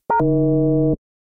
Synthesized version of drone blip sound from Oblivion (2013) movie.
Synth: U-HE Zebra
Processing: none
blip, communication, drone, oblivion, signal, ui